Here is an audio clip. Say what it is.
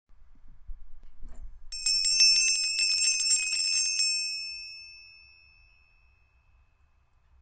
Bell, ringing, ring